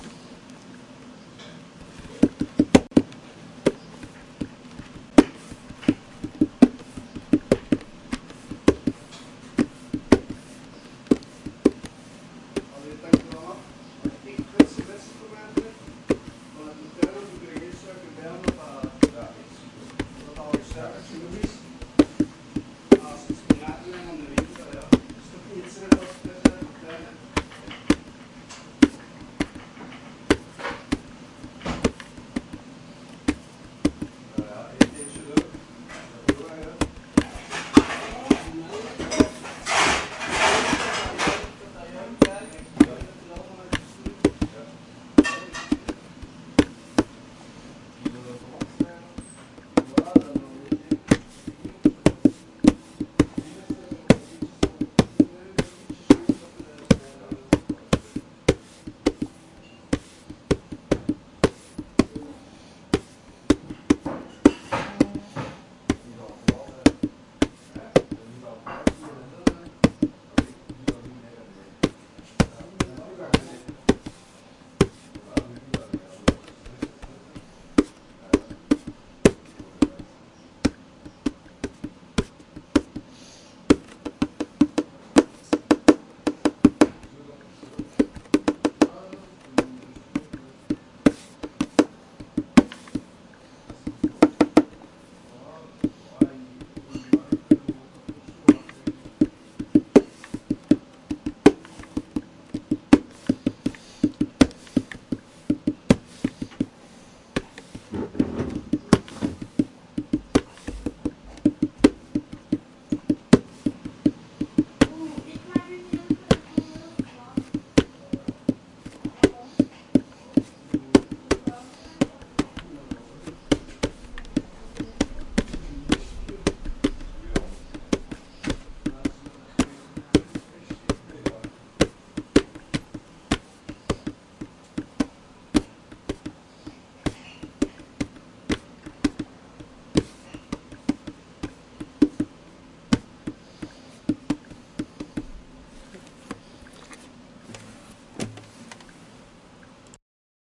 Guitar Body with Natural Background Noise (81 bpm)
A loopable guitar-body percussion tapping, at 81 bpm.
Ambience, Ambient